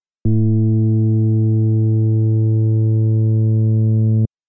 Analog synth playing a sawtooth wave pitched at A-1 (110Hz) with the filter closed.